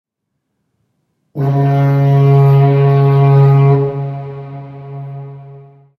Great echoing foghorn
A long echoing foghorn sound, made by blowing into a 10-foot-long PVC pipe in a fairly big, empty room. This is the best of the foghorn sounds I recorded. I think it sounds surprising like a real foghorn in the distance. Nice long reverb/decay after the main sound is over.